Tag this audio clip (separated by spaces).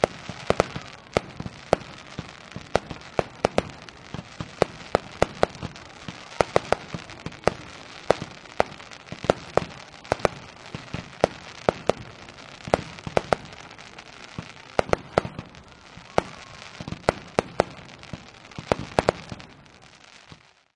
explosives fireworks display-pyrotechnics blasts bombs crowd show explosions